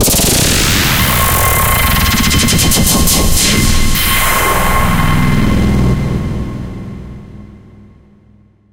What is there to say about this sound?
Cinematic Impact intro 02
inception, video, cinematic, free, hit, boomer, game, intro, boom, movie, effect, film, trailer, title, Impact, design, Tension